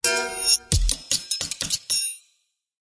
bibit co3`
break beat made with kitchen sounds
recorded with a LEM DO 21
mix with REAPER